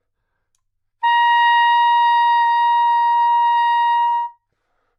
Sax Soprano - A#5
Part of the Good-sounds dataset of monophonic instrumental sounds.
instrument::sax_soprano
note::A#
octave::5
midi note::70
good-sounds-id::5598
neumann-U87, multisample, sax, soprano, single-note, good-sounds, Asharp5